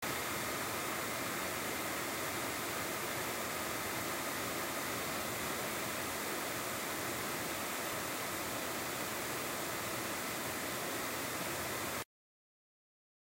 A recording of my laptop running late at night at full capacity while I was making a song. Used this myself, and I will link when the song is posted. This can be looped as long as you want.